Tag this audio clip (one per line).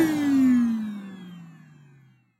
powered
machine